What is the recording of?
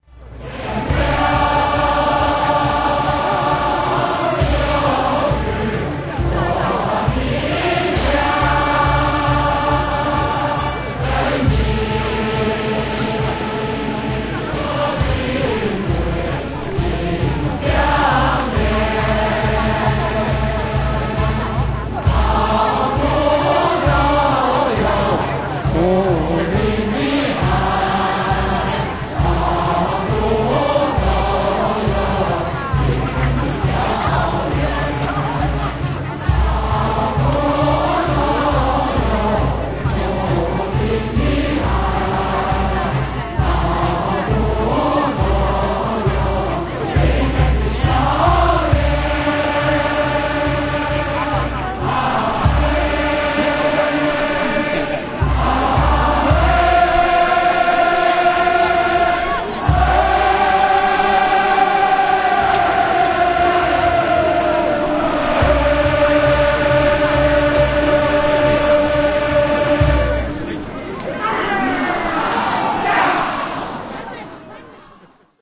Old folks singing to their hearts' content in Beijing Central Park. A medium Chinese percussion group with around 90 old folks gathered.